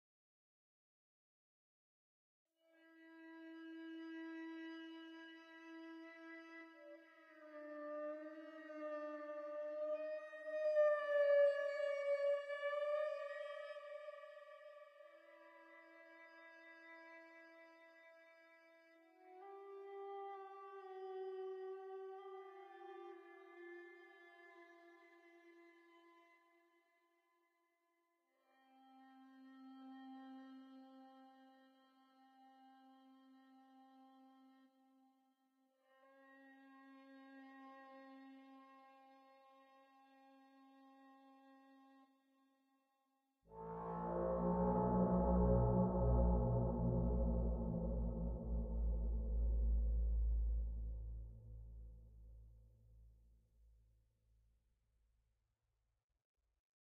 A music for horror games.
string, horrormusic, horror, forgame